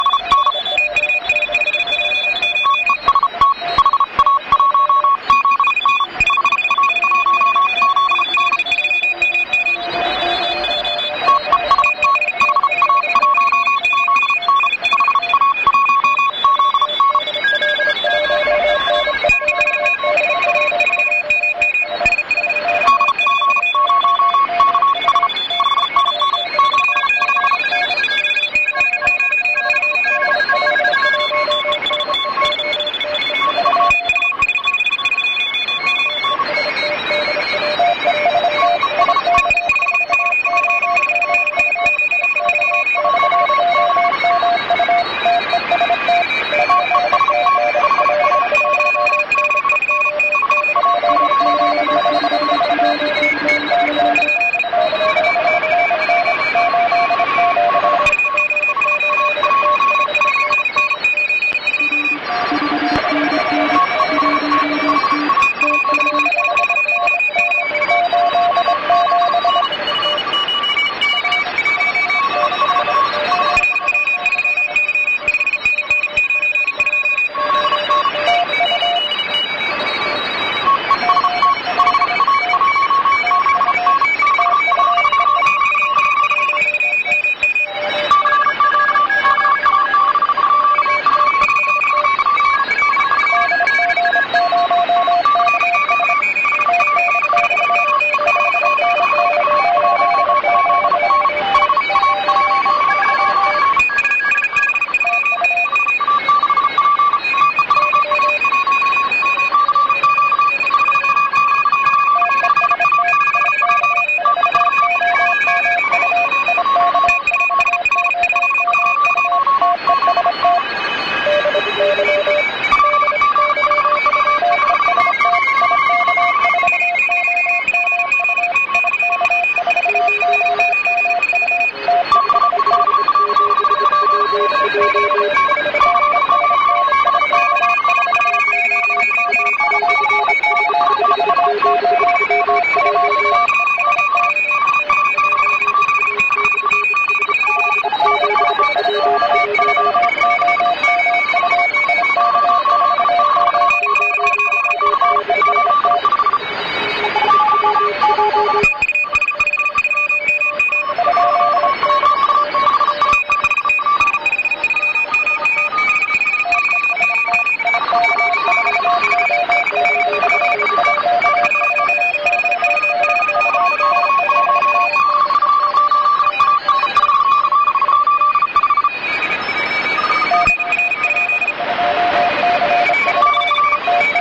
Unidentified signal. maybe several automatic morse stations (any radio operator in the room?)
electronic, radio, morse, shortwave, dxing